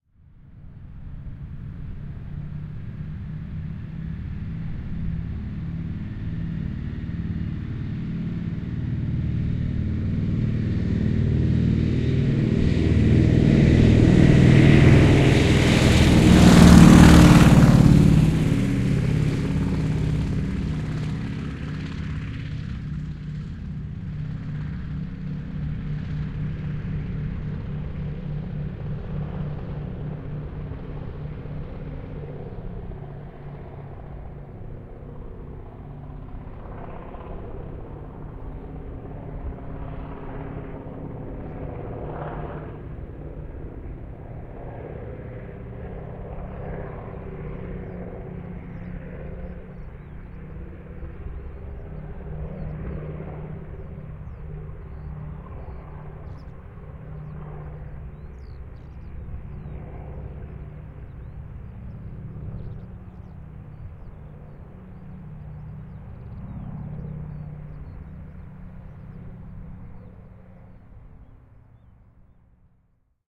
Lentokone, potkurikone, nousu, lähtö / An aeroplane, old propeller aircfaft, Junkers, a 1936 model, taking off
Vanha Junkers, vm 1936. Ottaa vauhtia kiitoradalla, nousu ilmaan, etääntyy. (Junkers JU 52, 3-moottorinen matkustajakone).
Paikka/Place: Suomi / Finland / Helsinki, Malmi
Aika/Date: 07.05.993
Aeroplane, Air-travel, Aviation, Field-Recording, Finland, Finnish-Broadcasting-Company, Ilmailu, Lentokoneet, Potkurikoneet, Soundfx, Suomi, Takeoff, Tehosteet, Yle, Yleisradio